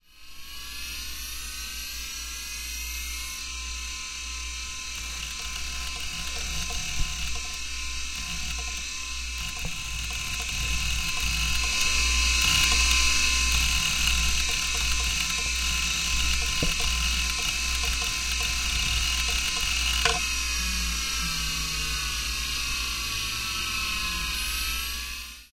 Electronic, Computer, Hard Drive - 1990s Compaq Hard Drive, Spooling Up, Turning Off
Recording of a 1995 Compaq computer desktop hard drive. Features electronic whirring, powering up / down sounds, whirring, clicking, "memory access" noise. Could be used for e.g. a movie scene with computers in the background, glitchy techno, etc.
Generator, Whirr, Access, Disk, Hard, Hum, Power, Click, Electronic, Computer, Drive, Servo